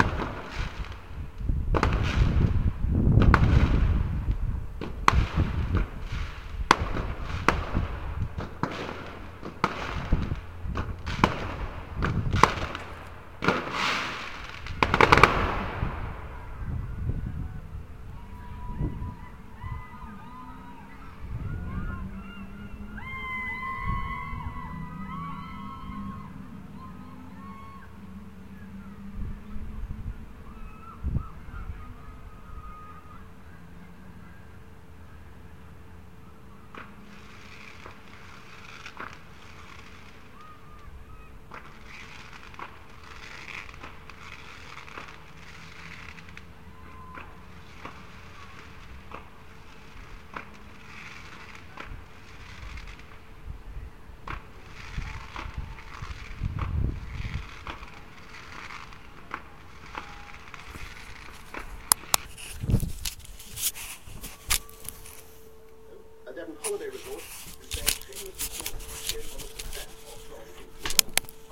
Recorded on November 5th (or thereabouts) from an attic window in Burngreave in Sheffield (UK).